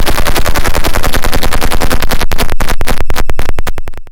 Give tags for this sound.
bytebeat cell-phone cellphone ring-tone ringtone sonnerie

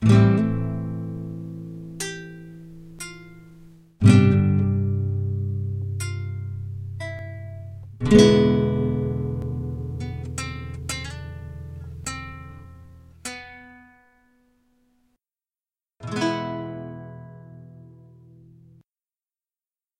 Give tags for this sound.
acoustic gloomy serene guitar

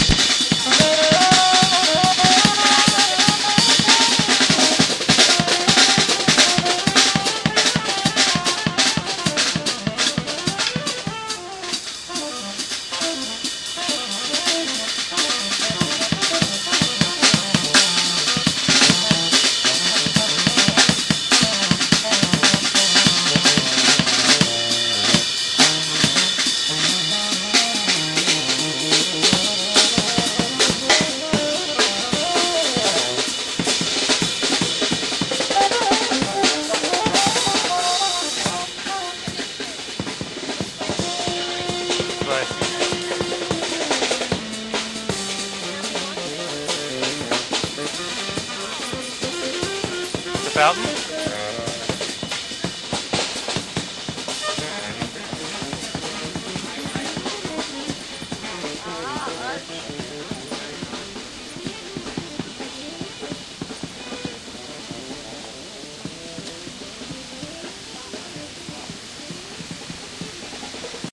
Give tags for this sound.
fountain new-york-city

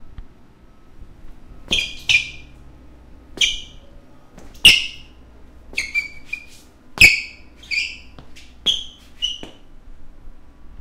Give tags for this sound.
squeak sneaker skid rubber

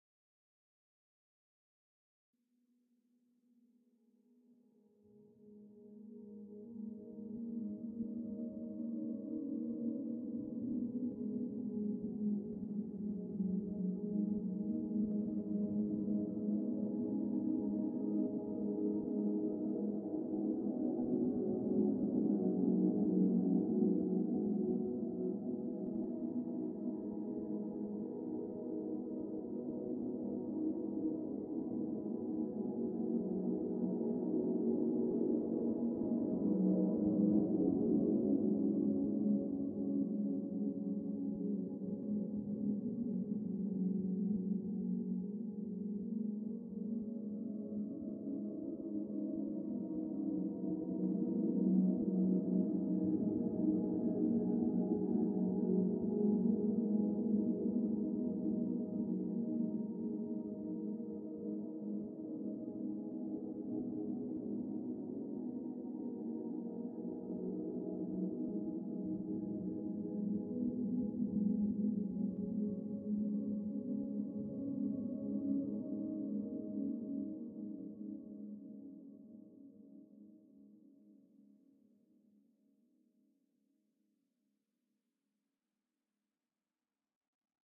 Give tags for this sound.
fx water

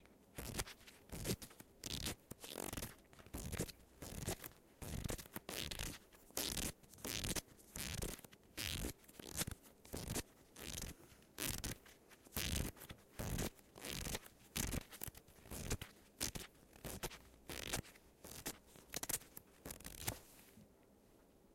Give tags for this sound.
BE-Piramide blocknote-papers mySound-Necati

